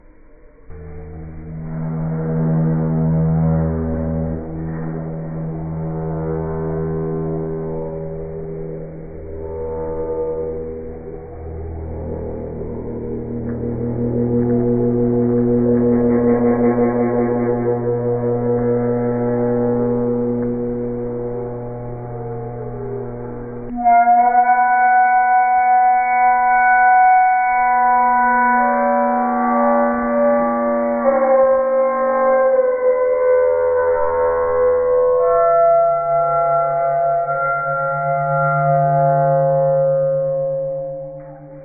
Scary alien ship or dark ambience
Just slowed down the creaking of an old rusty iron gate
drama, sinister, spooky, terror, alien, scary, creepy, ambient, thrill, haunted